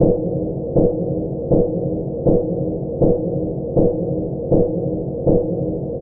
STM3 thumper 2
Same as thumper_1 except more mids and some more bass. Sounds closer to the 'boat being hit under water'.
beat, drum, under-water